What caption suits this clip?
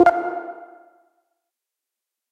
MA SFX Console 4
Sound from pack: "Mobile Arcade"
100% FREE!
200 HQ SFX, and loops.
Best used for match3, platformer, runners.
soundeffect, abstract, sound-design